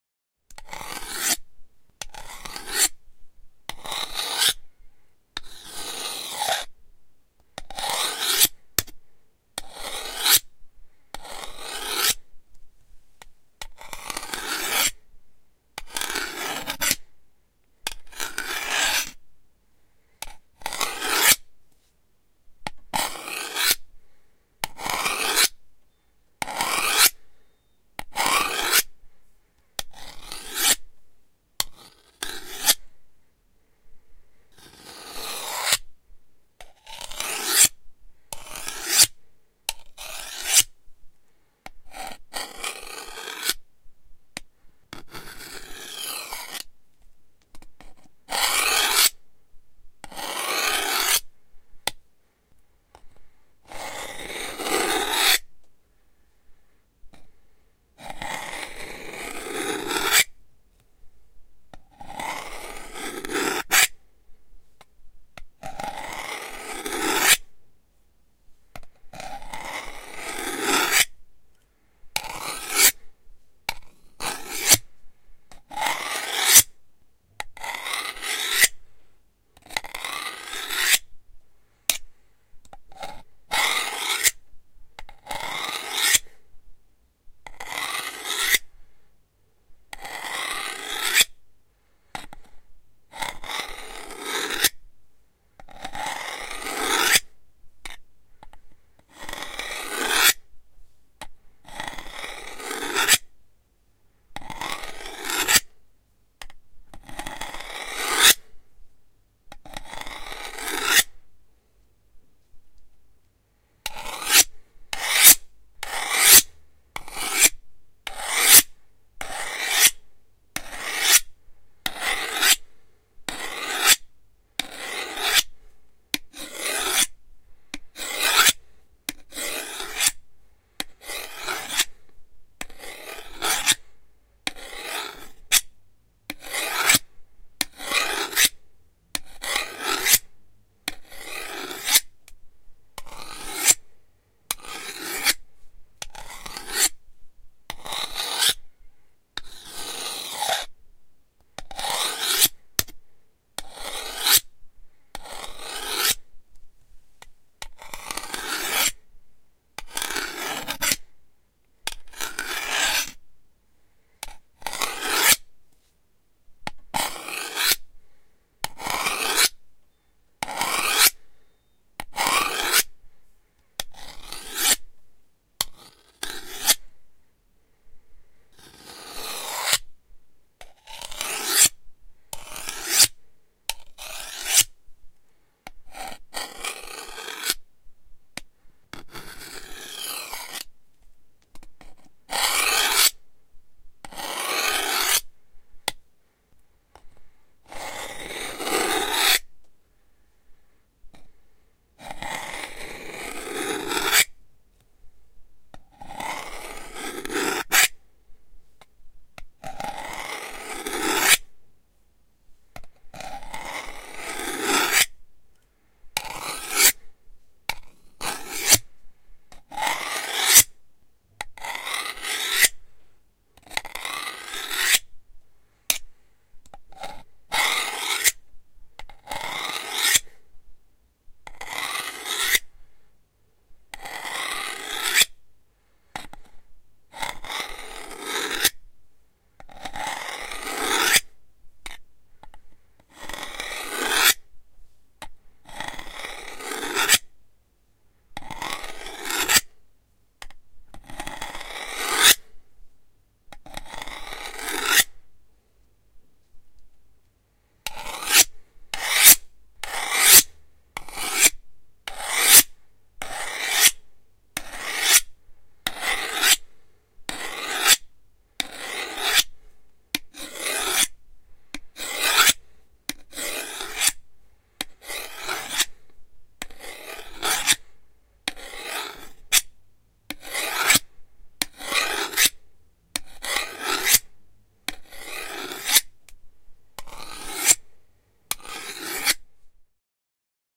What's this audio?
Sharpening a knife 2. Recorded with Behringer C4 and Focusrite Scarlett 2i2.
sharpening, blade, sharpen, steel, metal, sound, knife